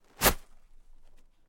A tissue being quickly pulled from a full box of tissues
scrunched, crumpled, paper